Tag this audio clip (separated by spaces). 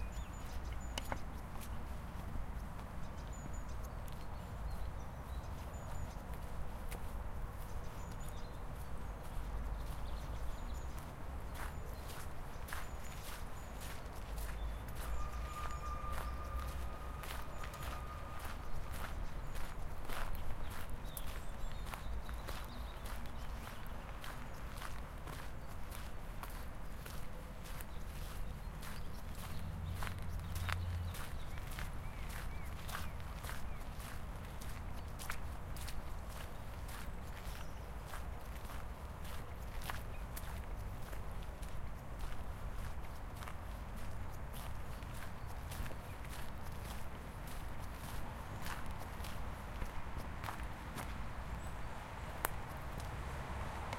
traffic gravel walking steps sand